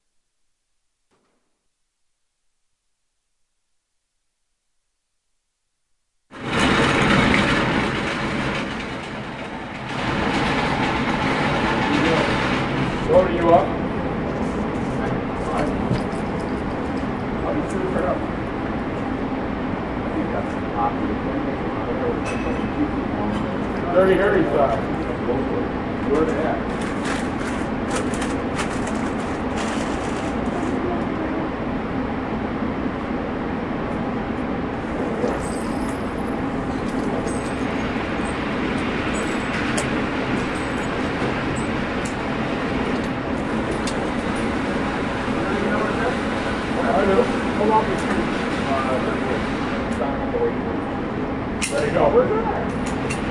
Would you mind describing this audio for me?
Sounds from an indoor gun range, inside the booth. Most shots are from a 22 caliber Smith & Wesson. LOCK and LOAD.
Sound starts with rolling the target away from the gun booth. Comments by shooters saying 'dirty Harry style' and also sounds of shells on the floor.